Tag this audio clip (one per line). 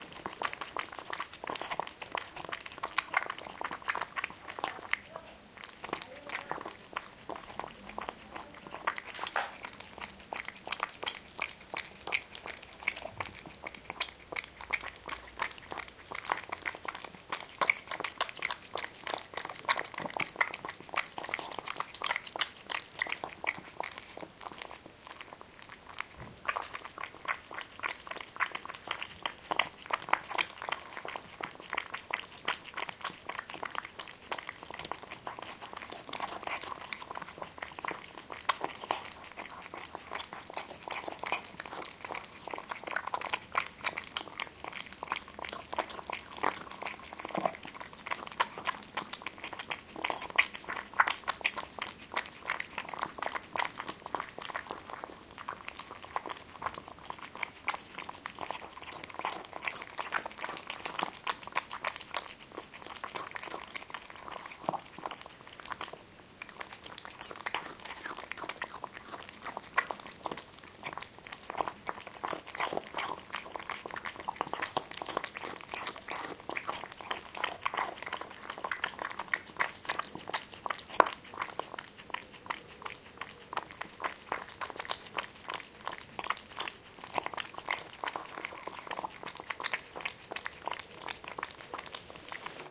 fieldrecording
field-recording